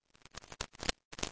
ifs-2012-12-31-6-both
Noise made by interpreting an 1D fractal as an audio signal (more density = higher amplitude). Rendered via chaos game and another algorithm from a recurrent IFS.
This one is stereo: channels were generated a bit differently but they hold the shape of the same fractal so they are mostly the same but have slight differences good for stereo use.
iterated-function-system, cracking, stereo-noise